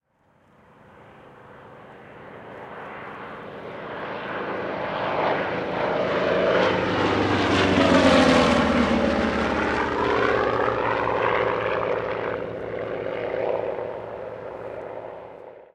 The deafening roar of an A-1H Skyraider flying in formation with a P-47D Thunderbolt at a local airshow.